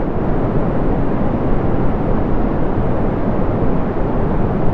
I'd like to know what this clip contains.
Rocket Boost Engine Loop
A sound I made for a robot flight game once.
space, plane, noise, jetengine, craft, boost, fighter, looping, jet, rocket, flight, loop, engine